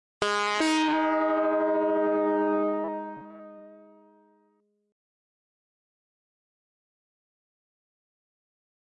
This is two seperate Oberheim samples, played through a sampler and strangely resonating at the end. The resonation at the end is completely absent when either sample is played by itself. The worble at the end is a product of the two sounds mixing in my sampler. Cool, eh?